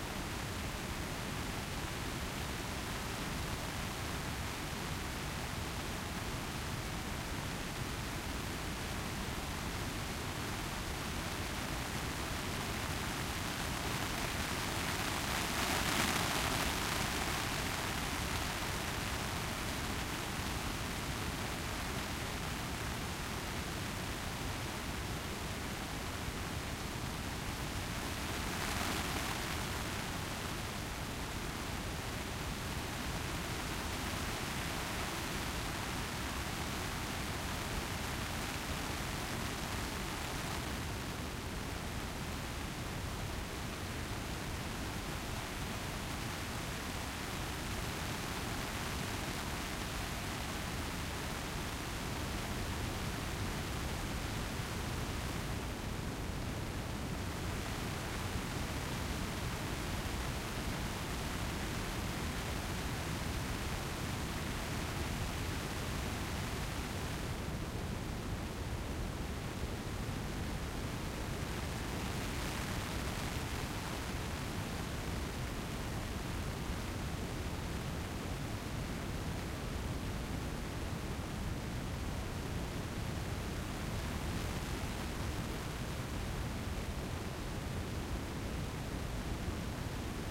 Noise texture
Noisy atmosphere effect with dinamics
soundeffect, ambient, abstract, effect, sci-fi, sound-design, sfx